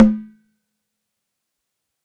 This drum is a Kenkeni replica made from an oil drum and fitted with cow hide skins then sampled on Roland SPDSX
drums, percussion